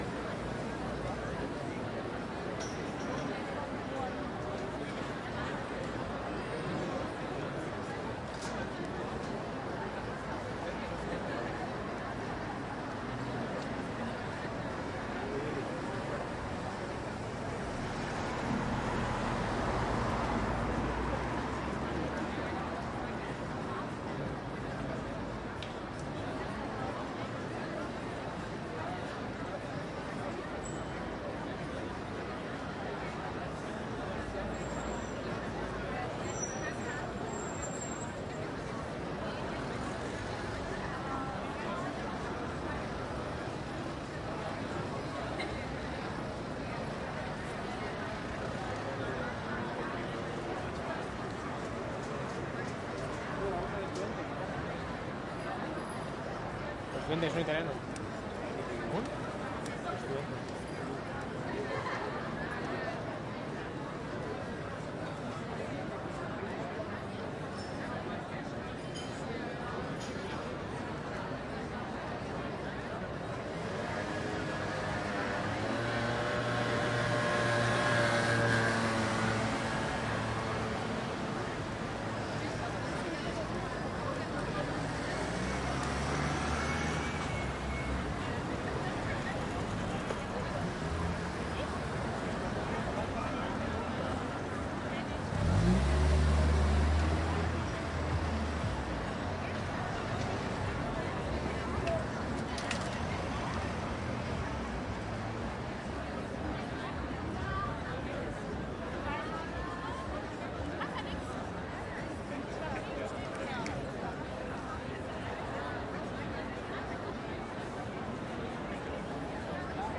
Residential neighborhood in Summer by day - Stereo Ambience
Lazy street in a residential neigborhood with a distant street cafe, almost no traffic, summer in the city
atmos, background, ambient, stereo, field-recording, background-sound, atmo, atmosphere, ambiance, ambience